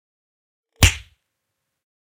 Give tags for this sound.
cartoon-sound punch cartoon

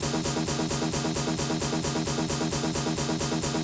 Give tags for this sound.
skipping-cd glitch techno hard experimental weird loop

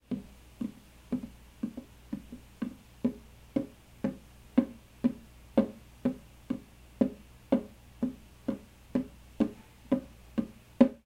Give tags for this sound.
floor street steps

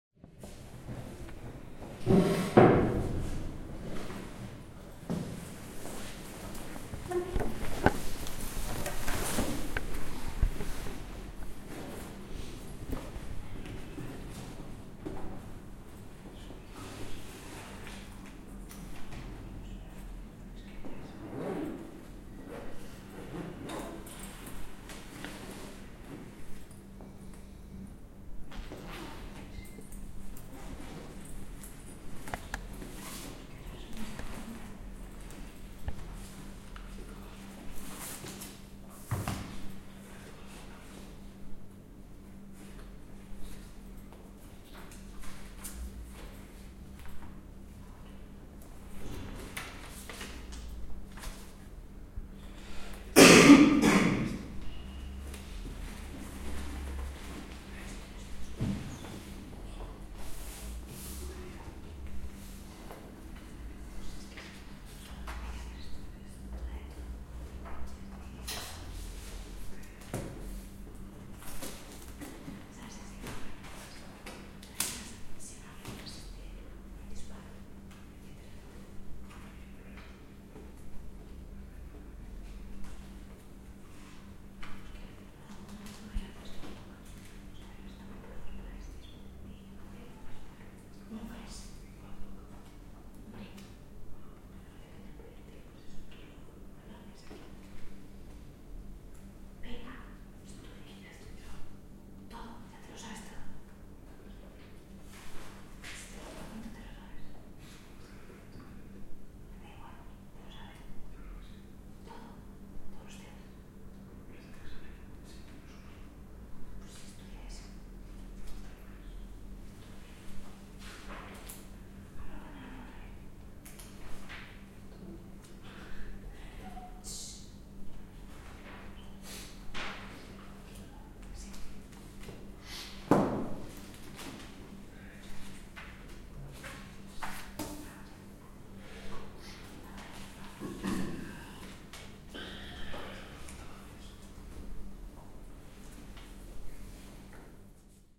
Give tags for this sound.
Espaol Biblioteca Publica Gente Susurros

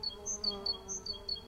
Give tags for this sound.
sonokids-omni funny

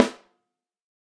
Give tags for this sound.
13x3 drum fet47 lawson multi pearl piccolo sample snare steel velocity

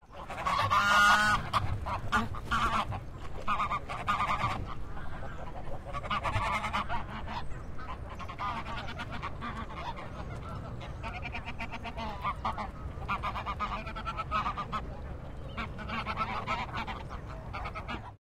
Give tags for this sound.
animal bird birds duck field-recording hyde london nature park

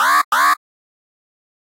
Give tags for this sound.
gui futuristic alarm

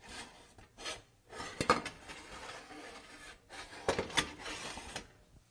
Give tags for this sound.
fabric metal cloth hiss slide object swish

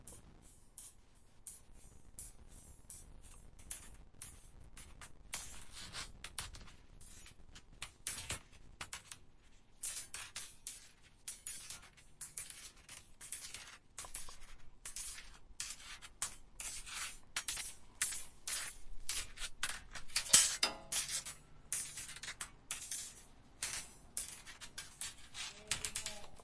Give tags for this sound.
mechanical machine start Power operation workshop engine sounds factory metalic machinery industrial

